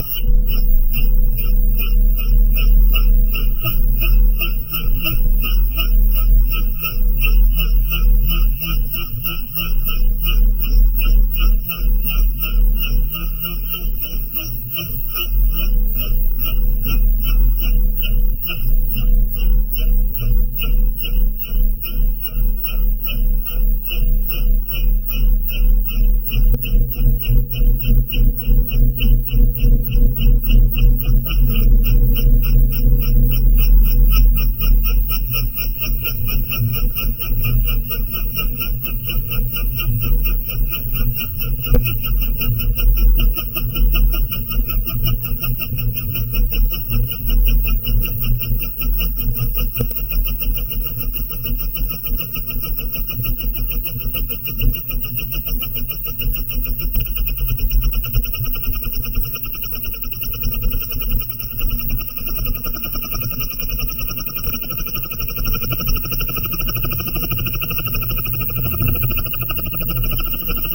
I have faked the sound of an old one-cylinder engine for small fishing boat. Manufactured around 1930. I used an egg whip as base sound and performed digital processing in NHC Wave Pad Editor.
nafta, accelerating, vintage, engine, one-cylinder